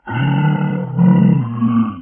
Austalovenator roar
Ausralovenator, Dinosaur, LaCerta, Rors, Terra